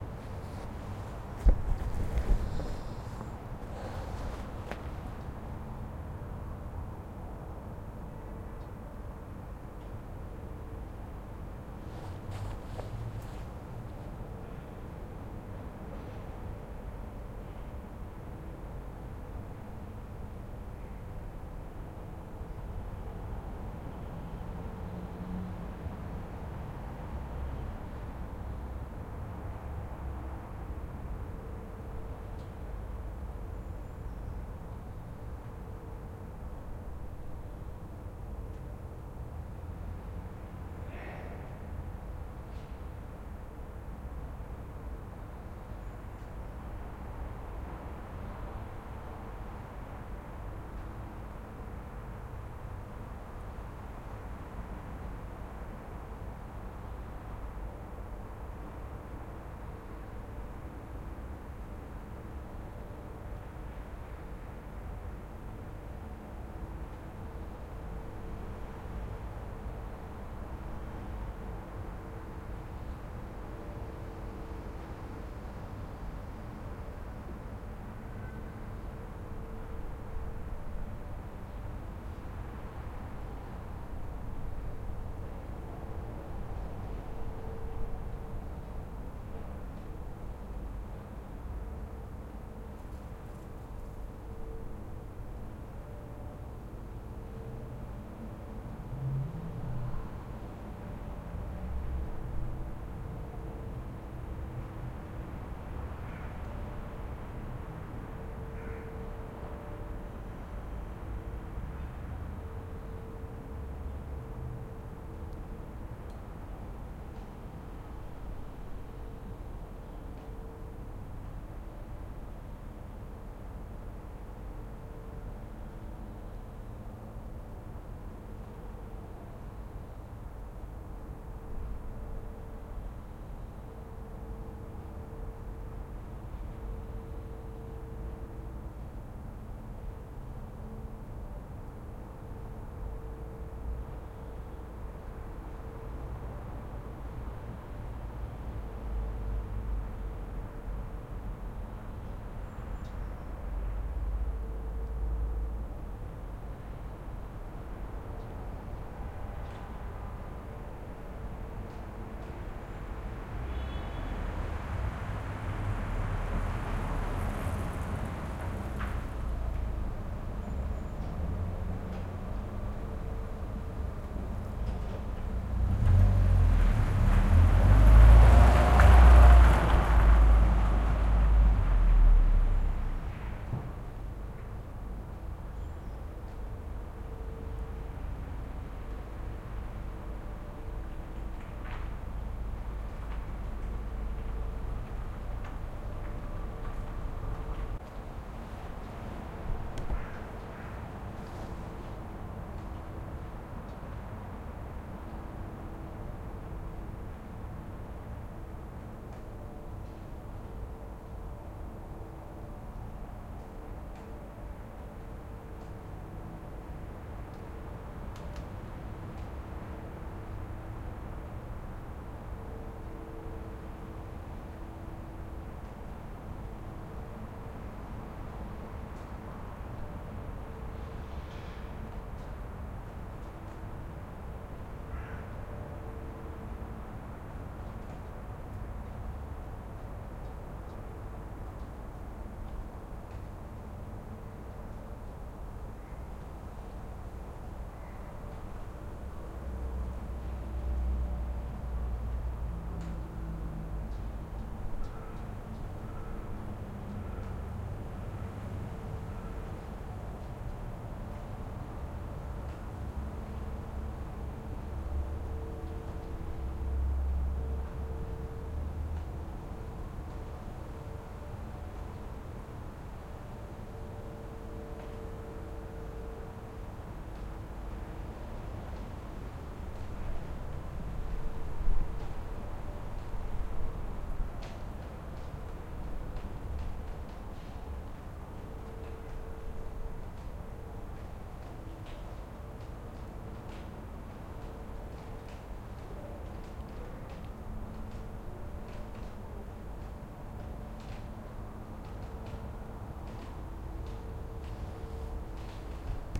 Moscow-center-court 2015.04.06(XY)
Ambience of Moscow court in a historical center.
Quiet close space.
air, Moscow, old, Russia